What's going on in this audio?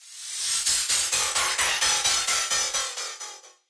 Reverse Transform FX
space, sweep, Transformers